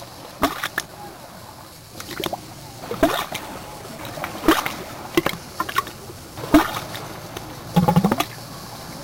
all bubles
From summer 2008 trip around Europe, recorded with my Creative mp3 player.the sound when waves filled up a little cave in the concrete dock, by the sea in Eze, France.
bubbles, sea, water